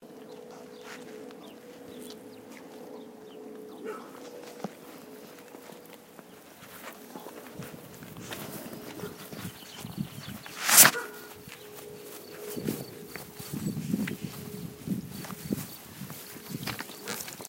Mysound gwaetoy bird&dog
Recordings made on a sound walk near Lake Geneva
Switzerland, nature, TCR